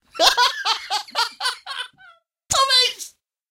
LAUGH STOP IT 01
This sample pack contains people making jolly noises for a "party track" which was part of a cheerful, upbeat record. Original tempo was 129BPM. This is the artist being tickled by his wife; laughing and telling her "stop it!"
female, vocal, stab, party, shots, vocals, stabs, shot, 129bpm, male